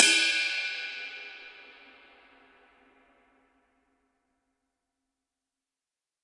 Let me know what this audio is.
Ottaviano22RideCymbal2500gBell
Ottaviano ride cymbal sampled using stereo PZM overhead mics. The bow and wash samples are meant to be layered to provide different velocity strokes.
cymbal, drums, stereo